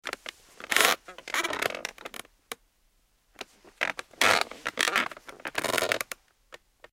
CHAIR, WOODEN SQUEAKS2
creaky old wooden chair. Slow it down it becomes a ship, wooden structure of some kind.
creak, squeak